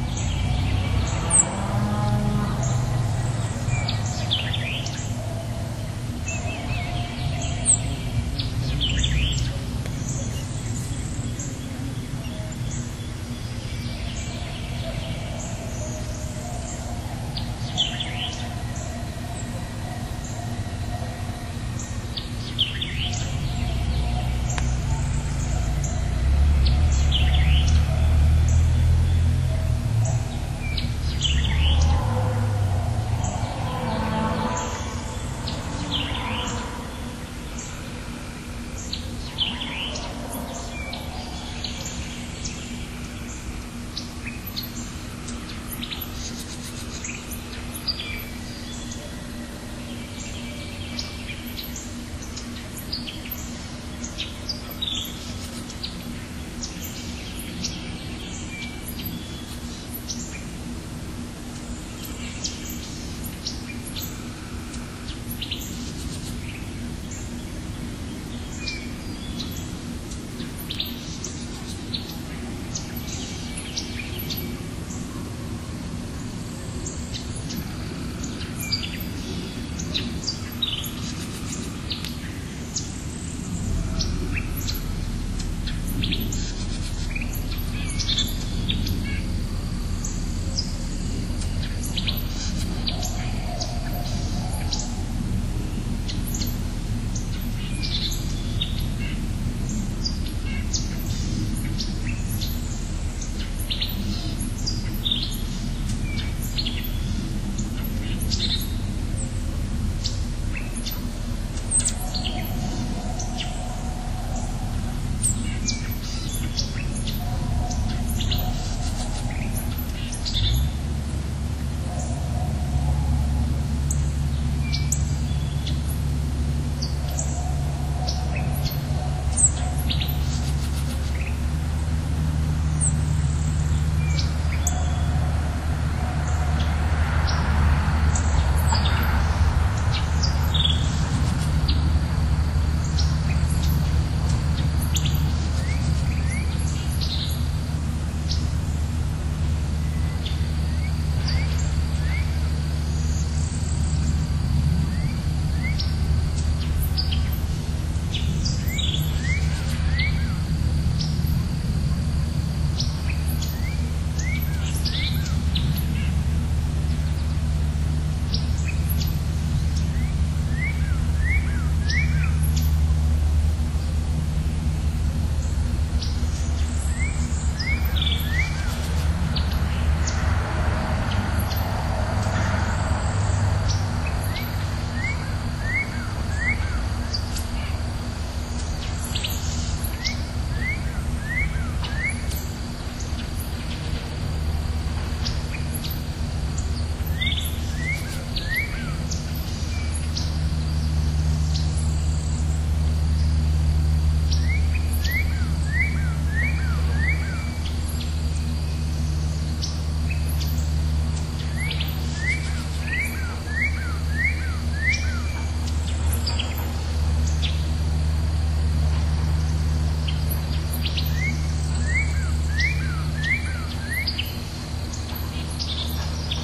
Gallant, Alabama 6pm
what it sounds like today from my front porch here in Gallant, Alabama at 6Pm
crickets, chill, Chirping, Nature, country, calming, Gallant, south, Farm, relax, animals, day, Dusk, Cow, 6pm, peaceful, Birds, Afternoon, Alabama, Cows, sounds, outdoors, Evening, Rural, Moo, today, frogs